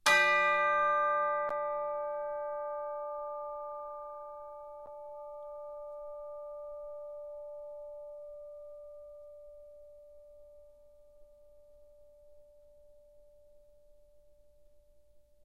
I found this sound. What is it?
Instrument: Orchestral Chimes/Tubular Bells, Chromatic- C3-F4
Note: D, Octave 1
Volume: Forte (F)
RR Var: 1
Mic Setup: 6 SM-57's: 4 in Decca Tree (side-stereo pair-side), 2 close